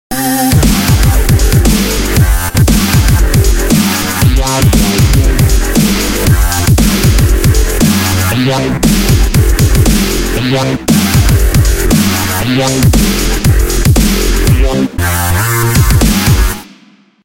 Likrakai Template 03
Here's a few loops from my newest track Likrakai! It will get filthier and filthier....i promise ;)
bass, drum, filthy, hat, kick, loop, mastering, snare, synth